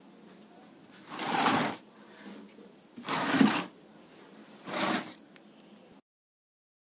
a little puff moving
furniture, puff, yelling